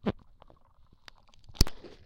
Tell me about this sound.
Contact mics handling noise 03
Some interesting handling noises on my contact mics.
contact-mic, homemade, piezo, handling